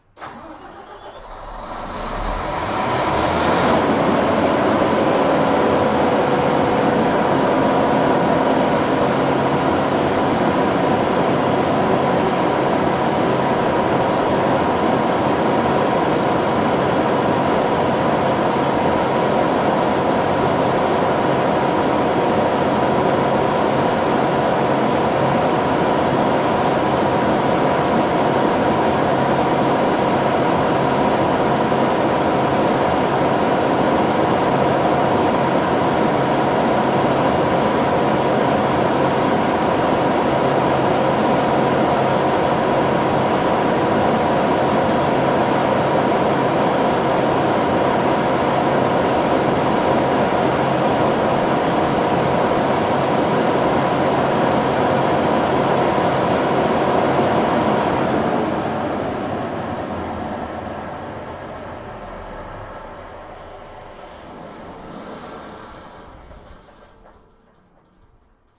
Generator StartUp, Run, Shutdown
Startup, Run and Shutdown of 400KW diesel Generac generator at my work.